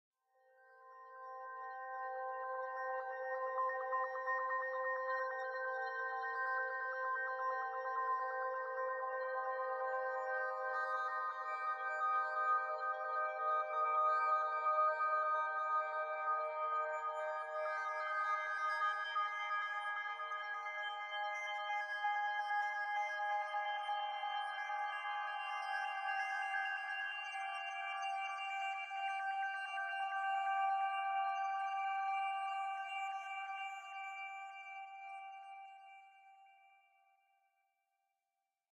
chime chords
Ambient layered chime arpeggio